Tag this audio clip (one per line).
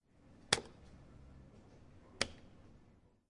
campus-upf
light
off
switch
switch-off
switch-on
UPF-CS12